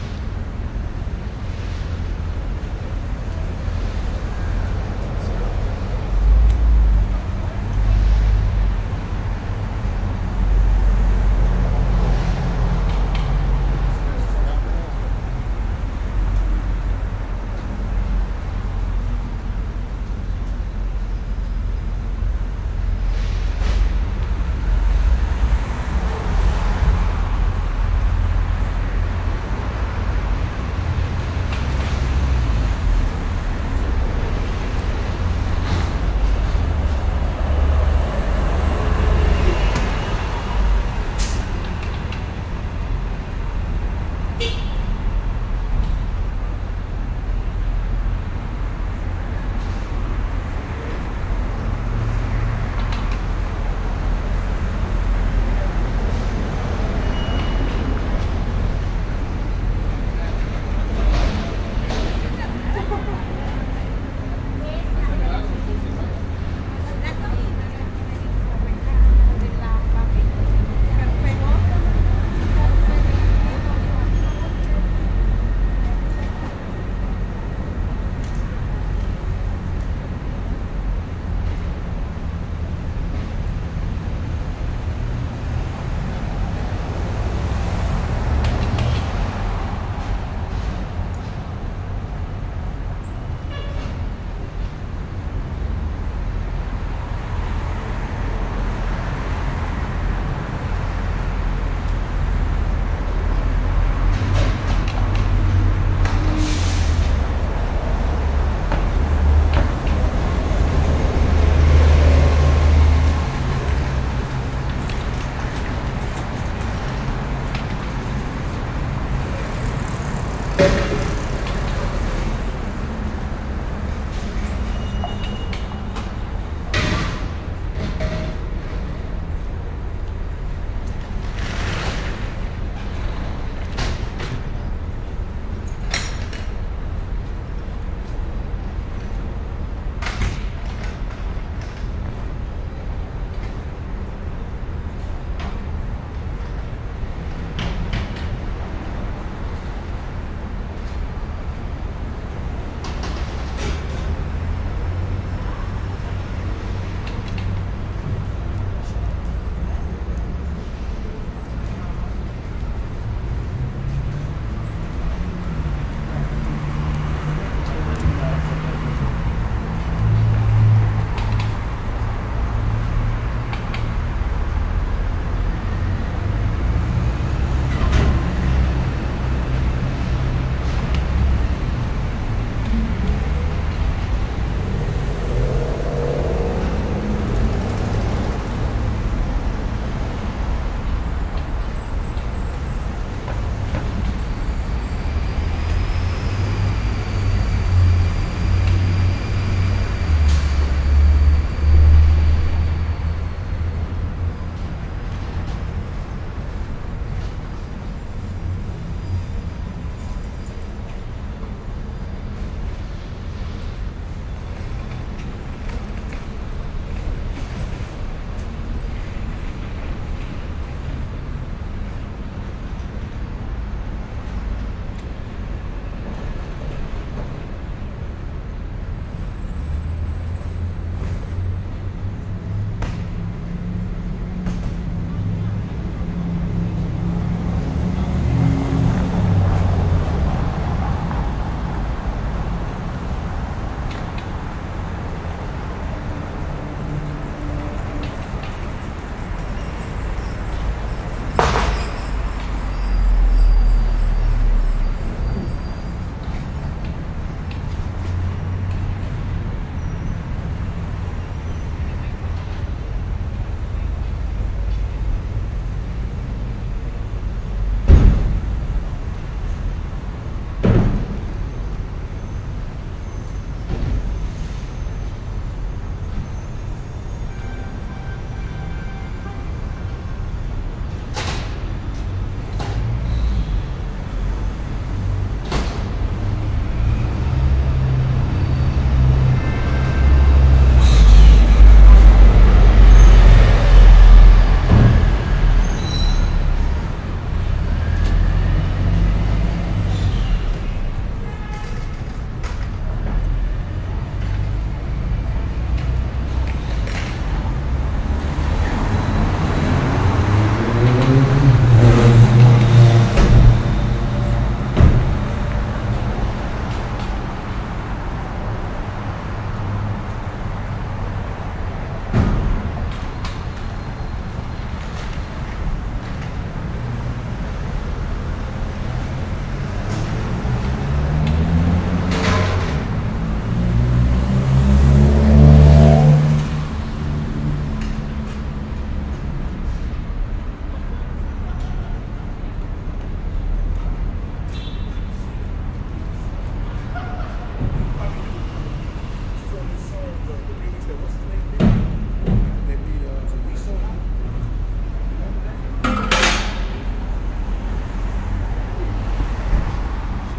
Downtown LA 12
One in a set of downtown los angeles recordings made with a Fostex FR2-LE and an AKG Perception 420.